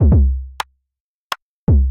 Rhythmmakerloop 125 bpm-37
This is a pure electro drumloop at 125 bpm and 1 measure 4/4 long. An overdriven kick and an electronic side stick. It is part of the "Rhythmmaker pack 125 bpm" sample pack and was created using the Rhythmmaker ensemble within Native Instruments Reaktor. Mastering (EQ, Stereo Enhancer, Multi-Band expand/compress/limit, dither, fades at start and/or end) done within Wavelab.
electro, drumloop, 125-bpm